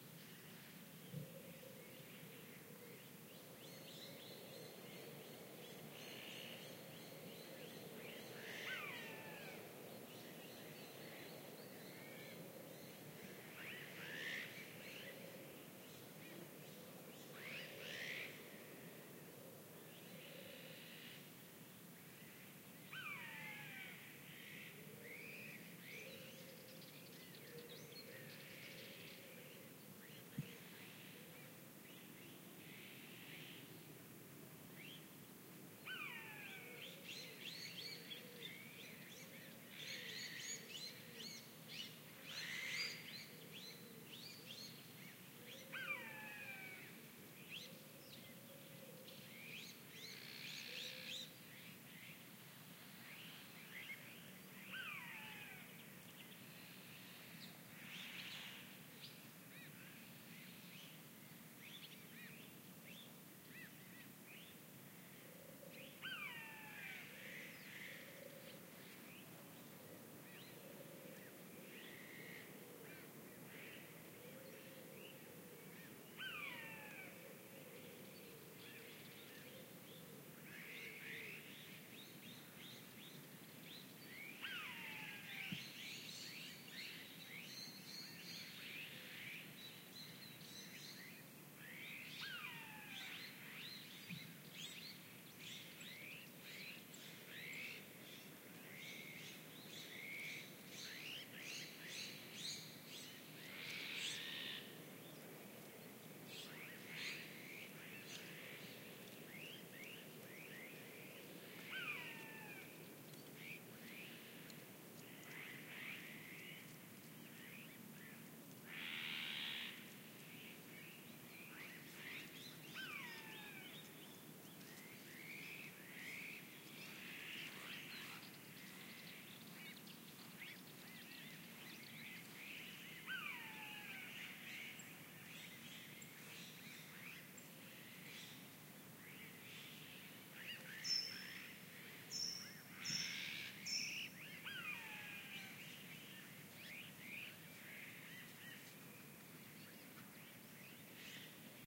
20070628.casual.ambiance
Pine forest ambiance in late afternoon near Donana, S Spain. I intended to make a 'realistic' recording (i.e. most bird calls are from very distant animals) so you'll need amplification to hear anything. Screechings come from a group of Blue Jays (Cyanopica cyanus) and a Buzzard (Buteo buteo). Recorded at 'Las Pardillas' site